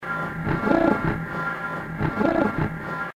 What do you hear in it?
sustained ambient pad sound created from combining a variety of processed samples in Native Instruments Reaktor and Adobe Audition; suitable for spreading over an octave of a keyboard